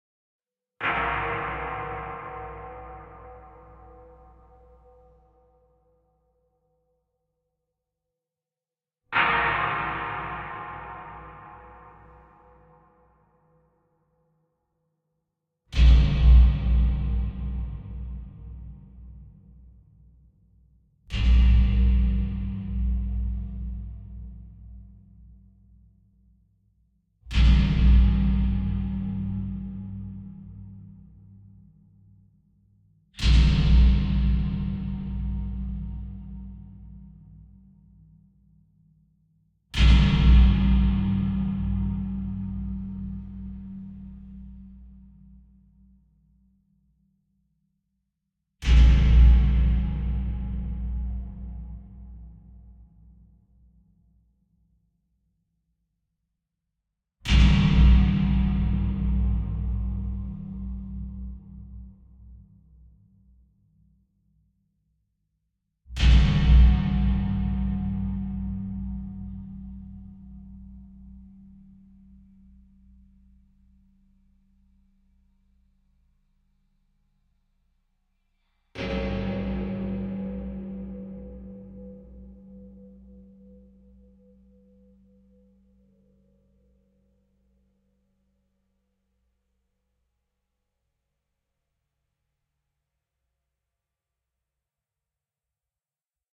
various metal impact sounds I recorded in a playground using piezo mics, with added reverb.
Piezo-> Piezo buffer-> Sony PCM M10.